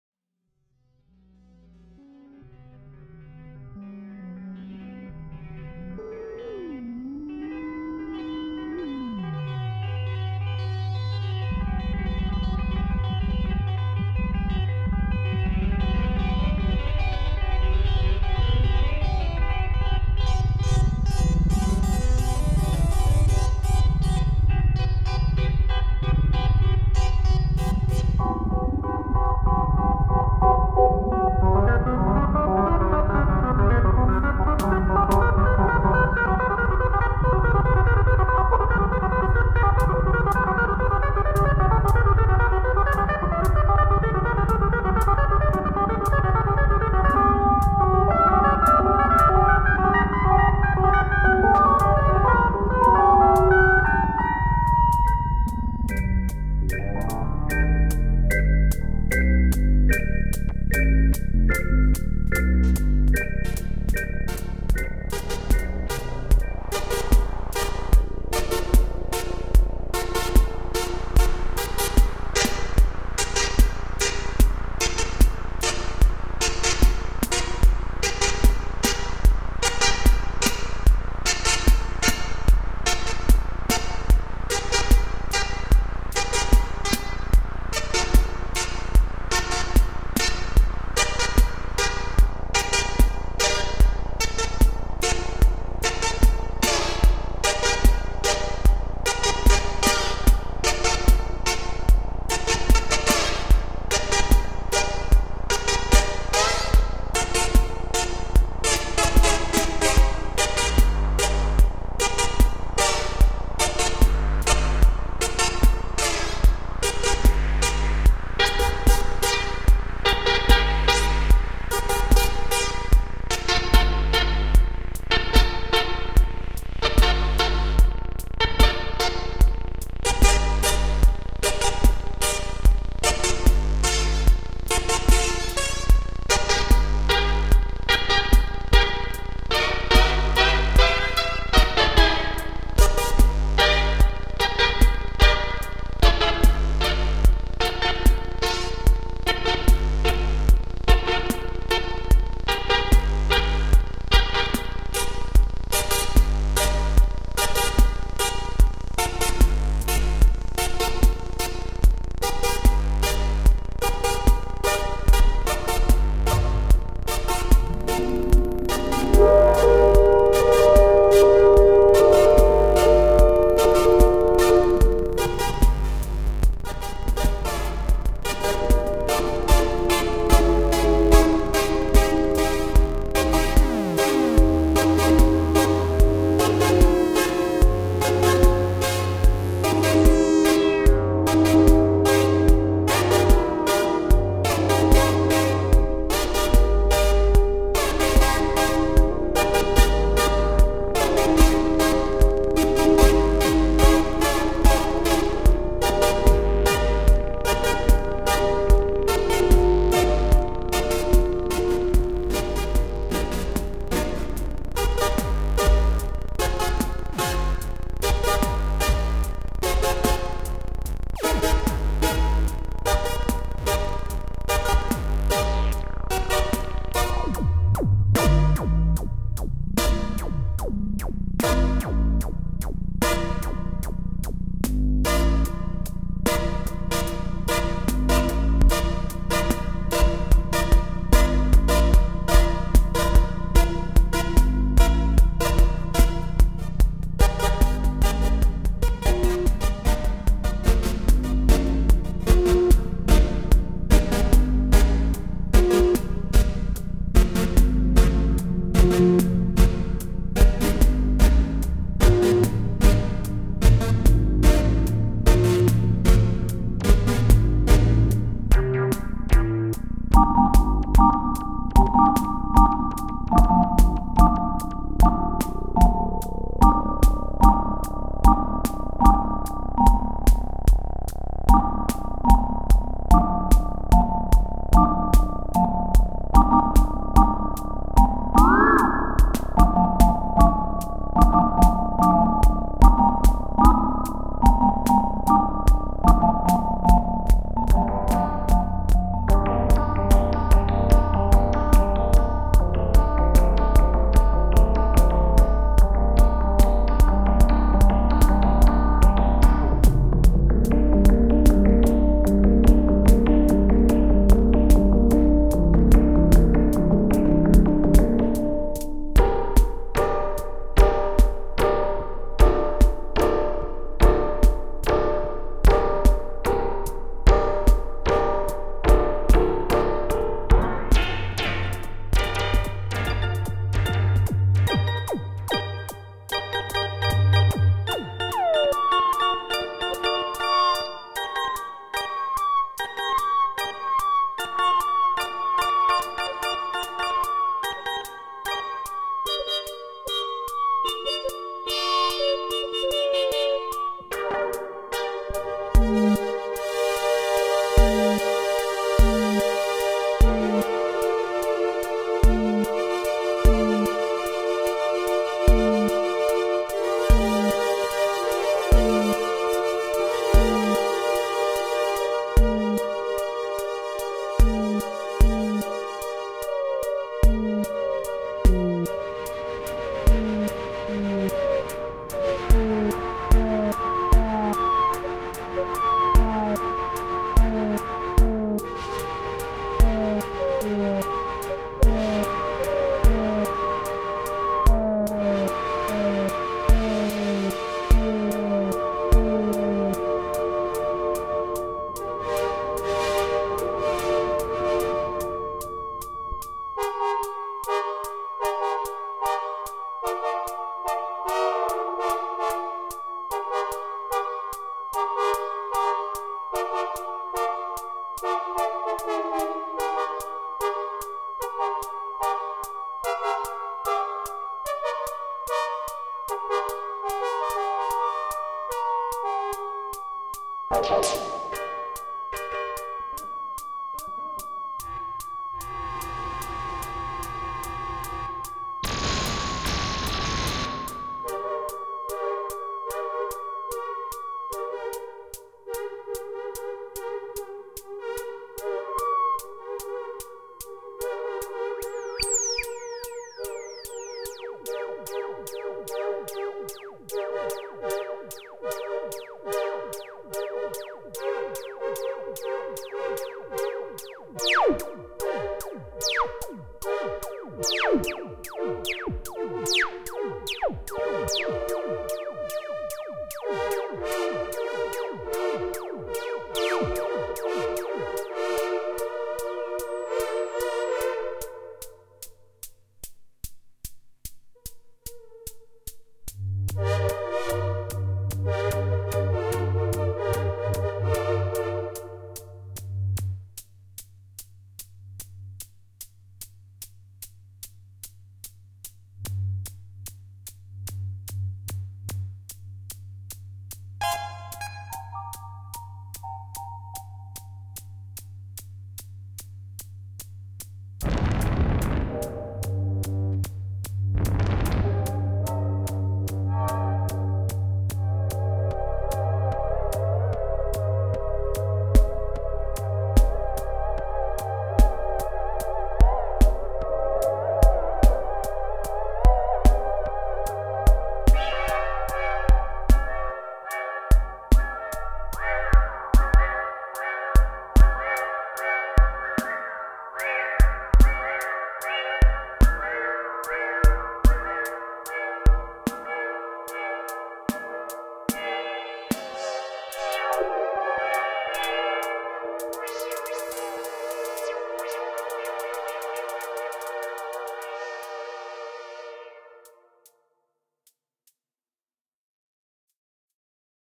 Monotribe and Prophet 08 Jam 2 (Dub etc)
Youssef and Rutger having fun with analogue synths.
analog, analogue, arp, arpeggiator, arpeggio, beat, drone, dub, dubstep, experimental, freestyle, jam, minimal-music, noise, psychedelic, sci-fi, science-fiction, space, spacesynth, synth, synthesizer, trip, trippy